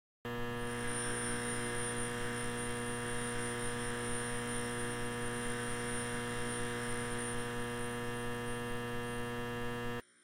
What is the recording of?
Buzzy scanner
Recorded with Zoom H5 with XY capsule. Kitchen fluorescent light fixtures.
Synth added to field recorded sound to beef it up. Made with Izotope's Iris 2.